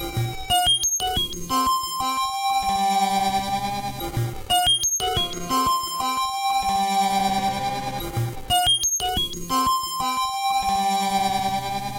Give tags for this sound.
analog; dsi; hardware; mopho; oscillator; prophet; synth; synthesizer

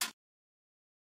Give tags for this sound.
fist; knock; table

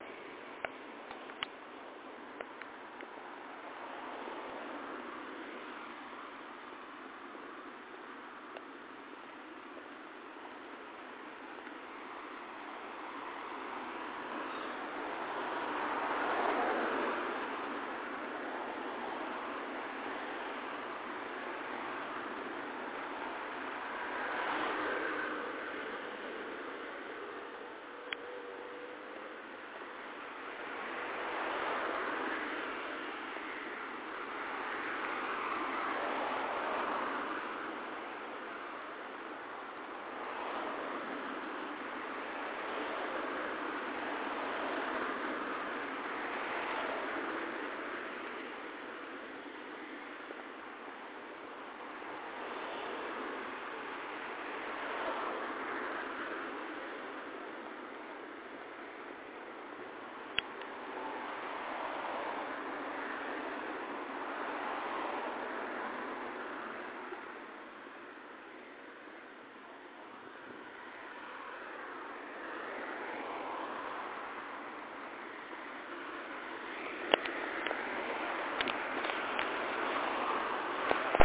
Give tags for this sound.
ambience; ambient; ambiente; atmospheric; avenida; avenue; background; background-sound; calm; calma; city; field-recording; general-noise; noise; quiet; road; rua; som; soundscape; street; tranquila; white-noise

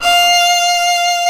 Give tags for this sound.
violin; keman; arco

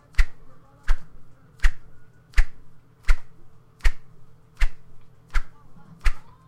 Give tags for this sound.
sound; sword

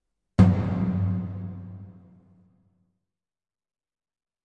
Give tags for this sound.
Effect; Ethnic-instrument

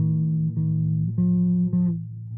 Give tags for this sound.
bass; loop

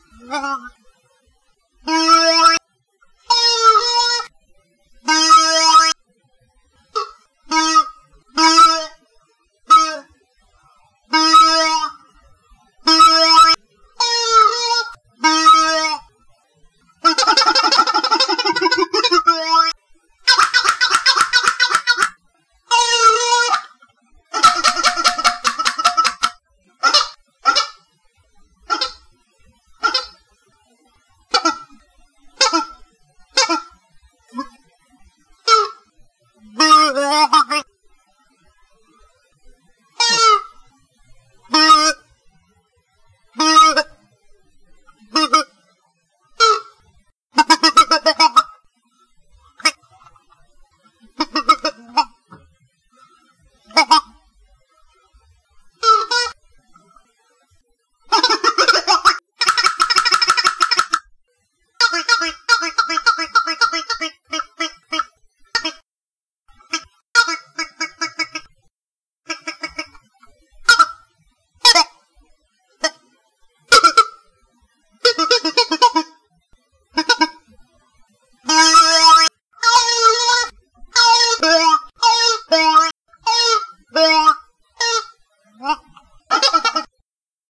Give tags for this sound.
silly; wah; toy

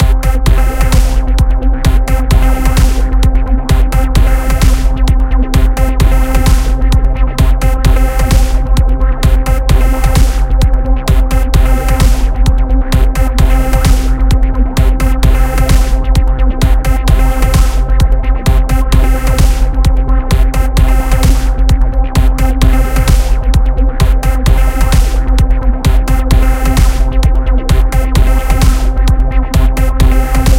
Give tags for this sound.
electronic; fruity; looping; stabs; background; studios; electro; techno; music; Erokia; Loop; loops; atmosphere; fl

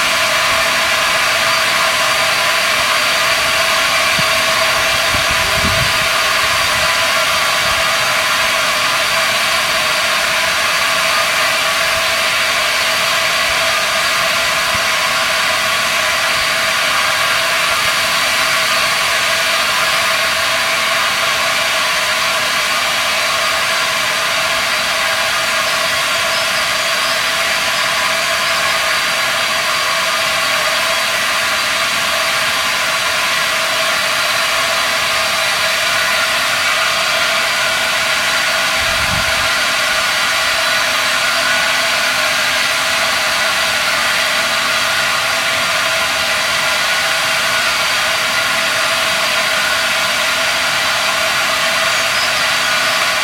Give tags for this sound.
field-recording; flow; gas; hiss; hum; industrial; machine; noise

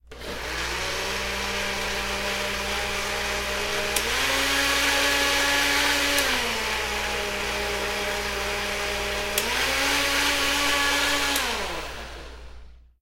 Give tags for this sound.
cooking; kitchen; motor; blender

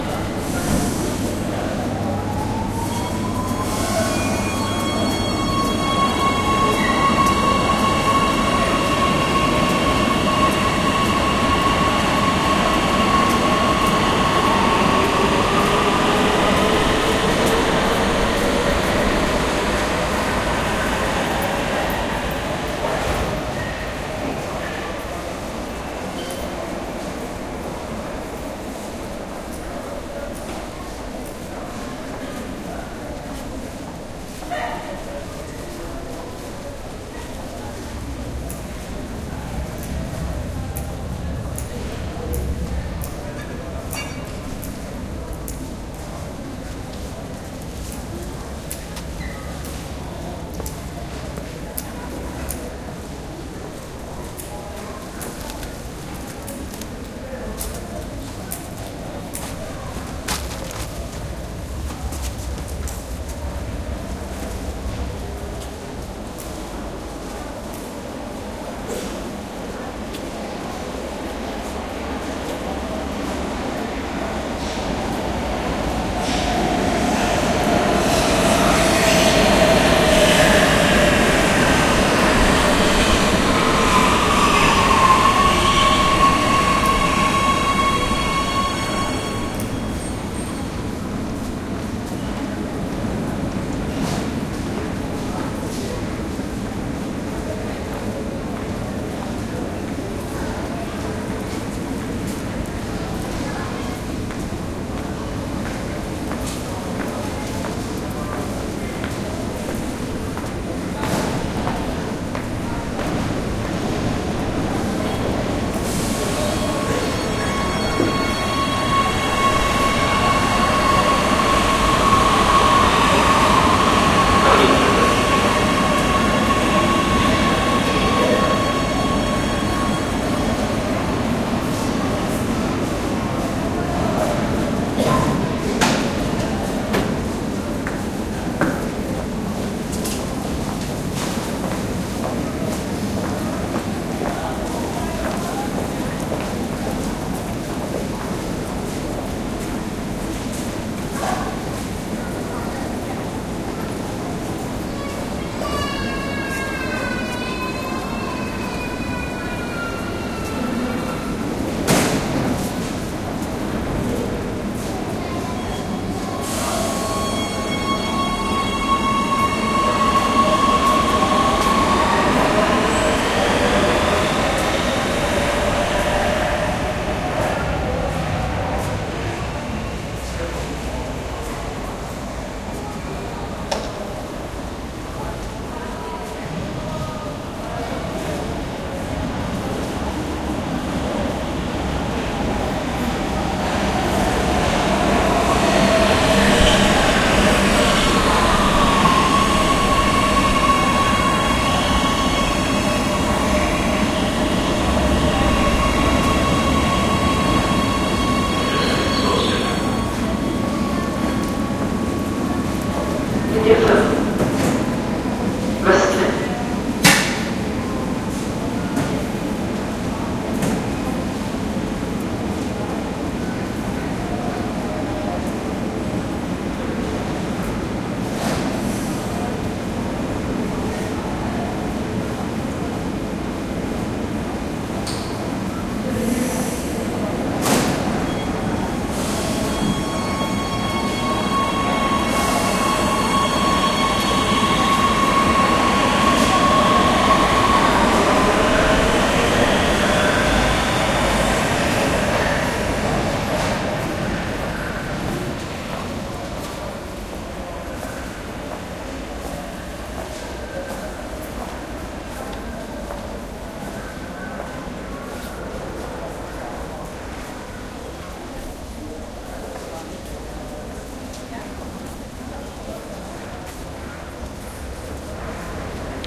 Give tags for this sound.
subway; int; station; recording; field

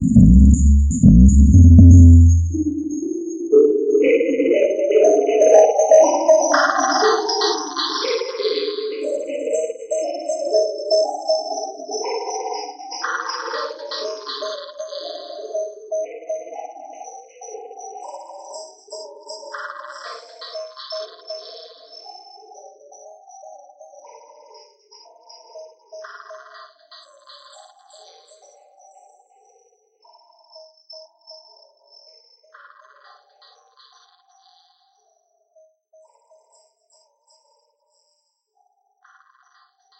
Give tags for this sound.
delay; rhytmic; loop; bpm; distortion

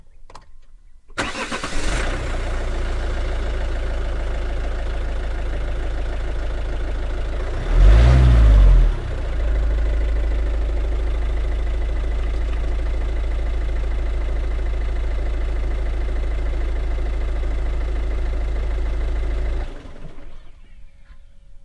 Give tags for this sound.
engine; machine; field-recording; environmental-sounds-research; diesel

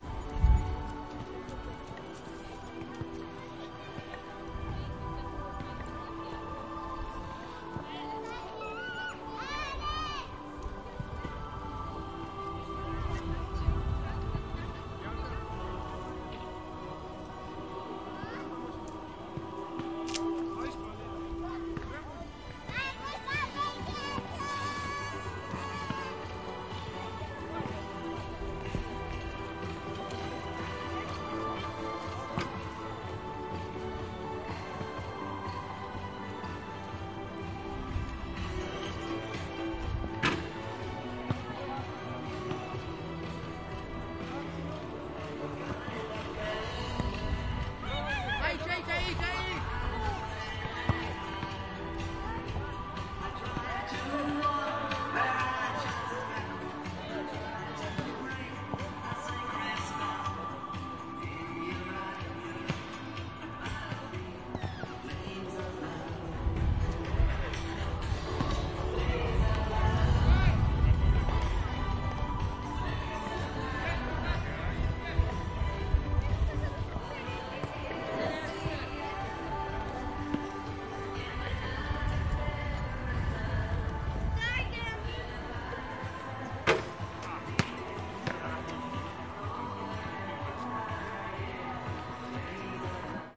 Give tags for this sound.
ambient; field-recording; park

